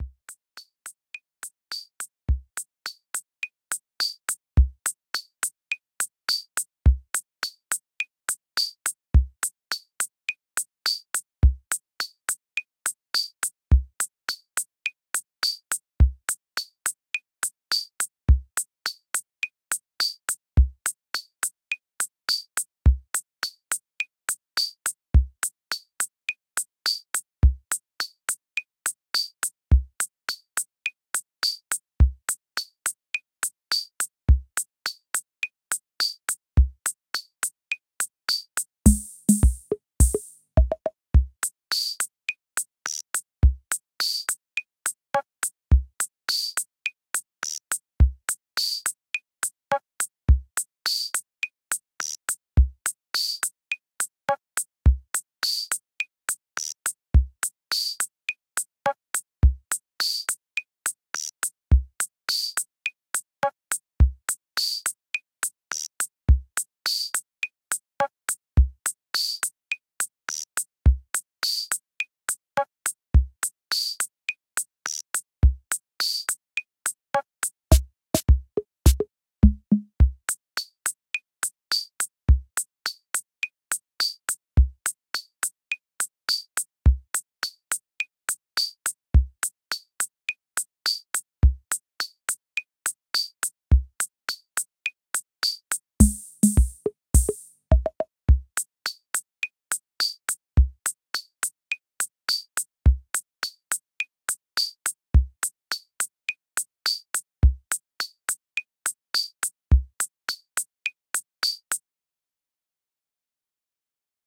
West Side Bike Beat
A little beat made in Garageband v10.3.4. Uses the Boutique 78 beat machine at 105 bpm for a dainty and quirky sound.
Credit Marc Ella Roy and link to this page if you use this beat! Thanks!
105-bpm, beat, beat-machine, beats, cheery, drum-loop, fun, garage, happy, lofi, loop, music, percussion-loop, quirky, rhythm, song